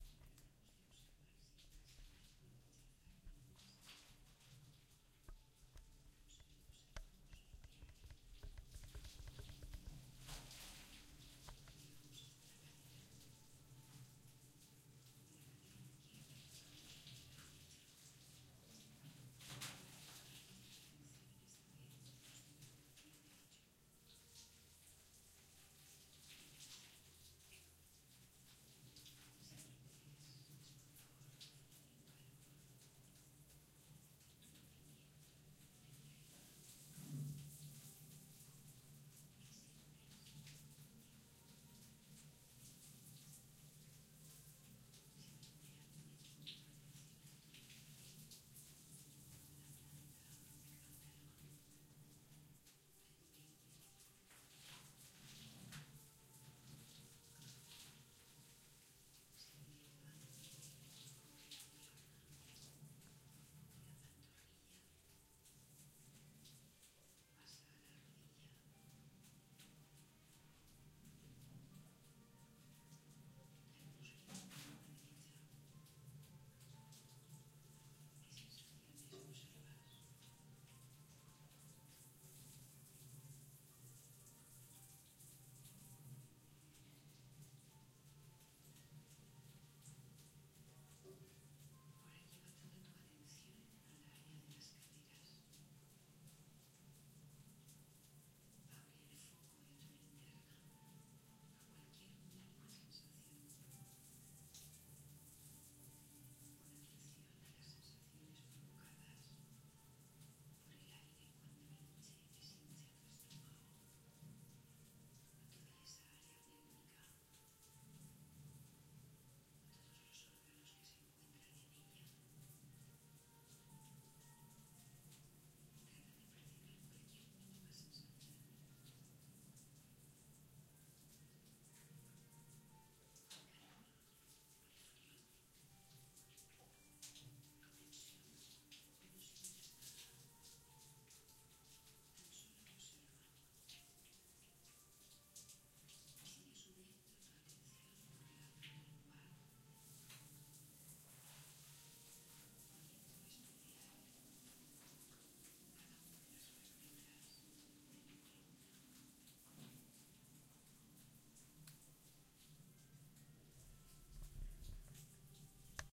Shower recorded behind the door